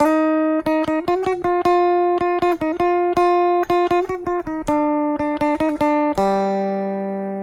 Guitar Riff 2 (F Major) (130)
Guitar, 130, 2, BPM, Major, Riff, F